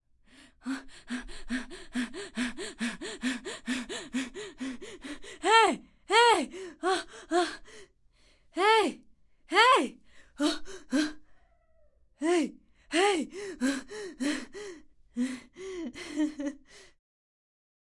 Murmur of Joy Man